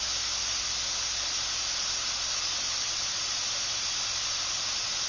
blue-noise
noise, blue-noise, colored-noise